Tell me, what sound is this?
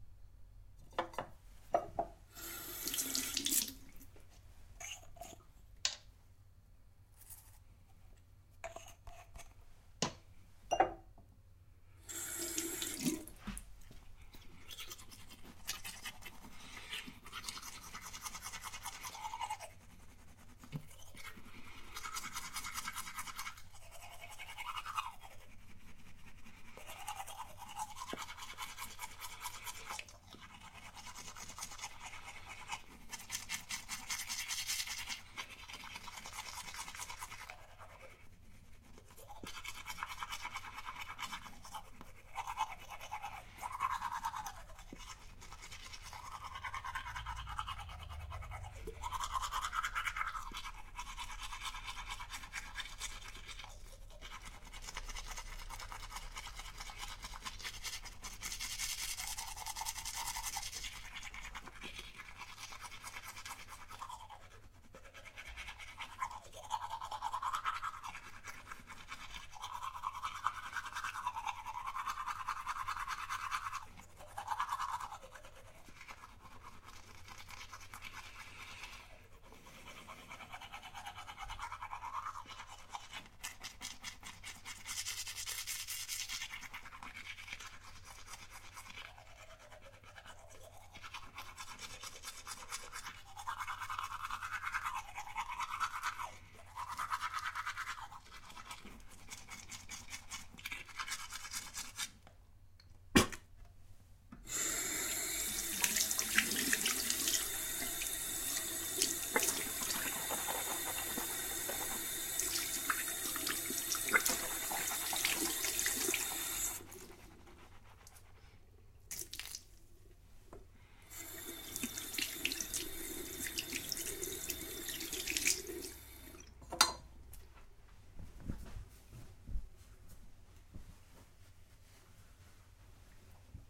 brushing teeth
grabbing the toothbrush, opening the paste and brushing the teeth a while. after all split it out and flushing the mouth.
hygiene, brush, bath, paste, teeth